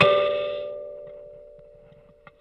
96kElectricKalimba - O3harmonic
Tones from a small electric kalimba (thumb-piano) played with healthy distortion through a miniature amplifier.